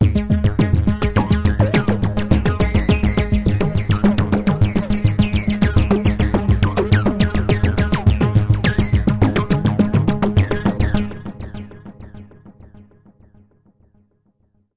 130 BPM arpeggiated loop - G#2 - variation 3
This is a 130 BPM 6 bar at 4/4 loop from my Q Rack hardware synth. It is part of the "Q multi 005: 130 BPM arpeggiated loop" sample pack. The sound is on the key in the name of the file. I created several variations (1 till 6, to be found in the filename) with various settings for filter type, cutoff and resonance and I played also with the filter & amplitude envelopes.
130bpm, arpeggio, electronic, loop, multi-sample, synth, waldorf